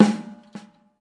01a Snare Aftershot-Smooth Cymbals & Snares
01a_Snare_Aftershot-Smooth_Cymbals_&_Snares
cymbals, sticks, drum, click